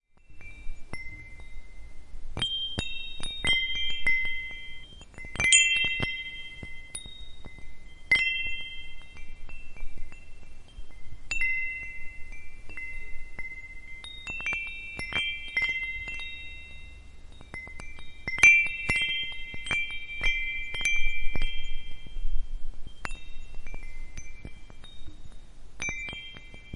Content warning
The sound of a small metal wind-chime